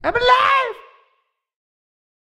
Funny Cheering Shout (5)
A funny cheery sound of a creature that had success / was rescued
Cartoon, Cheering, Exclamation, Funny, Happy, Human, Rescued, Shout, silly, Yeah, Yippie